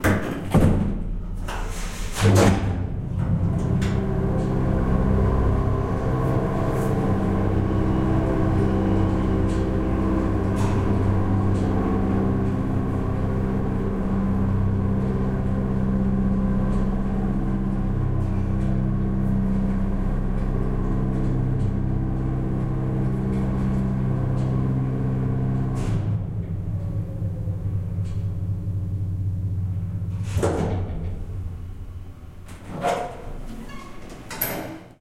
Sample of an old freight elevator with heavy metal wing doors.
Recording Device: Zoom H-4